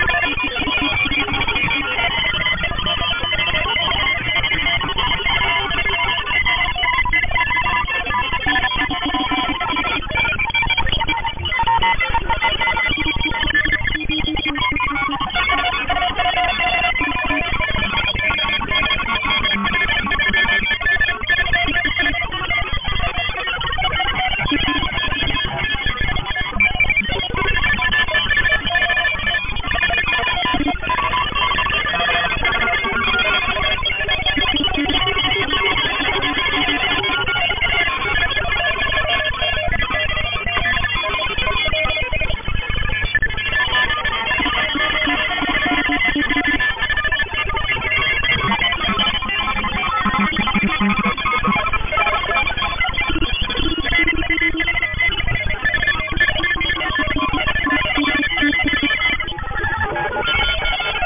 Data Transmission
A stream of data on shortwave radio. Picked up and recorded with Twente university's online radio receiver.
communication, beep, data, radio, shortwave